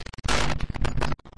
Viral Noisse FX 05